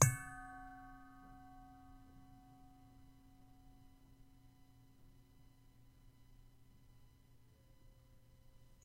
This is a bell / chime sound
I hit a bowl to create this sound
Recorded on a Yetti Blue Microphone 2015